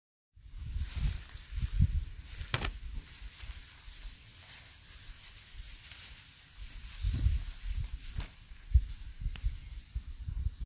A dry rustling sound like hay or grass; that sounds like a small animal or someone searching for something.
animal, bush, dry, grass, hay, leaves, mouse, rustle, rustling